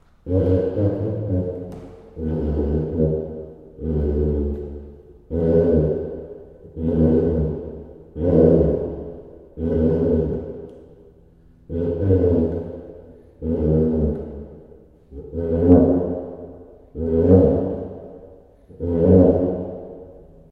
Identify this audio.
The putipù is a percussion instrument used in Neapolitan folk music and, generally speaking in the folk music of much of southern Italy. (An alternatate name is "caccavella".) The name putipù is onomatopoeia for the "burping" sound the instrument makes when played. The instrument consists of a membrane stretched across a resonating chamber, like a drum. Instead of the membrane being stuck, however, a handle is used to compress air rhythmically within the chamber. The air then spurts audibly out of the not-quite-hermetic seal that fastens the membrane to the clay or metal body of the instrument.
folk, caccavella, bamboo, naples, putip, clay, rubbing, membrane, percussion, ethnic